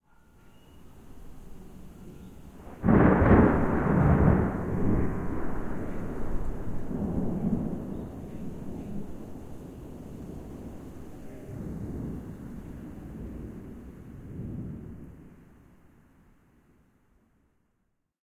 Thunder sound recorded with Tascam DR 07 and remastered with Adobe Audition